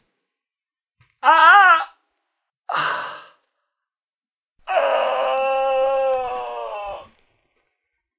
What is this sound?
Pain part 1: groan, torture, suffering, despair, man, male
torture, groan, suffering, male